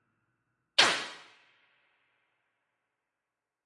microphone + VST plugins
effect, sfx, sound, fx